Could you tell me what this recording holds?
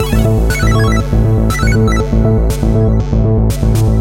Chipland Loop (120 BPM-A Major)
This is a loop created using various third party VSTs and processing units, the loop can be used anywhere but would ideally be suited to a reto gaming application. The loops tempo is 120 and is written in A Major.